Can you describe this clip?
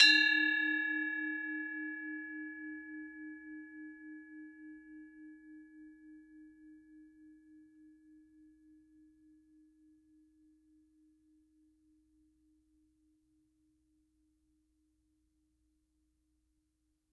Bwana Kumala Gangsa Pemadé 03
University of North Texas Gamelan Bwana Kumala Pemadé recording 3. Recorded in 2006.
bali, percussion